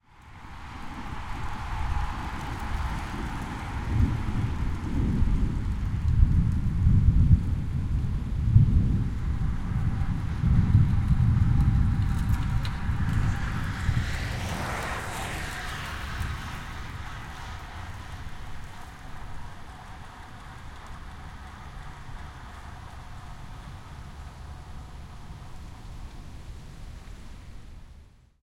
pass by w thunder
Single bike passes with thunder.
Part of a series of recordings made at 'The Driveway' in Austin Texas, an auto racing track. Every Thursday evening the track is taken over by road bikers for the 'Thursday Night Crit'.
nature, thunder, bicycle, field-recording